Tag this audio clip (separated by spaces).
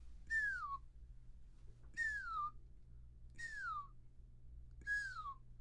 whistle whistling command